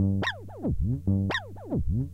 This sample pack are the result of an afternoon of experimentation
engraved with a MPC 1000, is an old guitar with the pedal Behringer Echo Machine, I hope you find it useful
Este pack de muestras, son el resultado de una tarde de experimentación
grabado con una MPC 1000, es una vieja guitarra con el pedal Echo Machine de Behringer, espero que os sea de utilidad